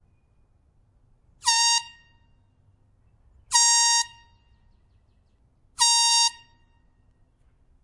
Air horn
Equipment: Tascam DR-03 on-board mics
A recording of a somewhat cheesy little airhorn.